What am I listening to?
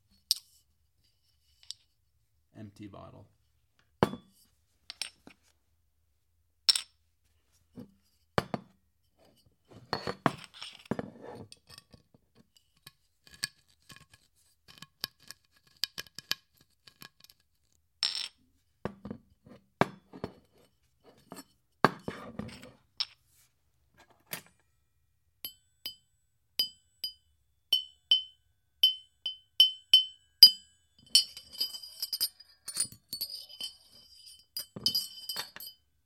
Indoor Beer Bottle Dishes Noises Various Miscellaneous
Miscellaneous sounds made by a beer bottle. Cap open, fork clink, etc.
indoor, kitchen, soundeffects, wood